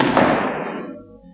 Pinch-beat
Bonks, bashes and scrapes recorded in a hospital at night.
hit, hospital, percussion